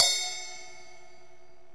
crash 3 bell 1
This is a crash from another 12" cymbal.
bell, crash, cymbal, live, loop, loops, rock, techno